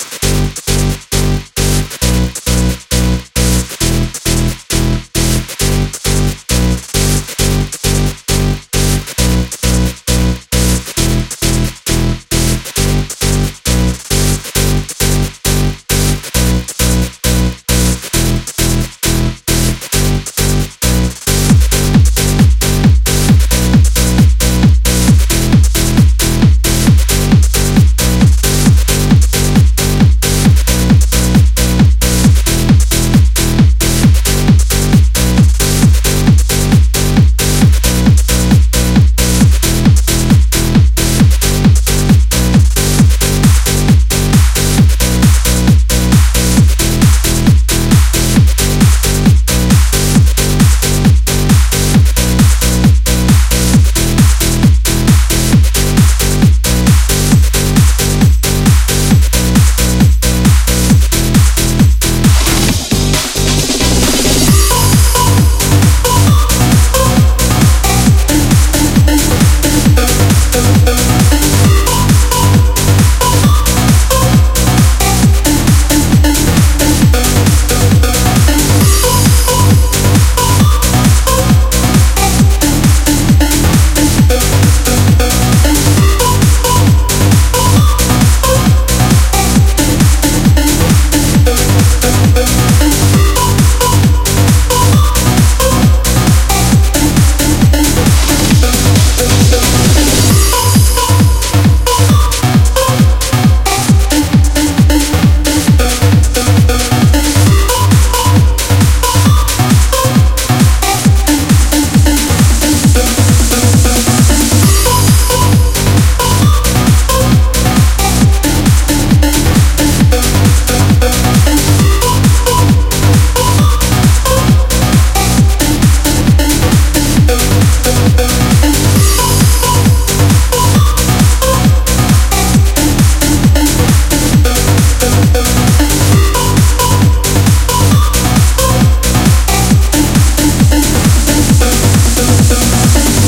Overworld [FULL]
A full loop with video game sounding synths with modern sounding dance music. Perfect for happier exciting games. THANKS!
8bit, mario, music, sega, videogame